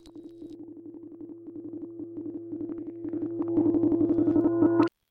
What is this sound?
pin glitch2
abstract, glitch, musiqueconcrete, noise, processed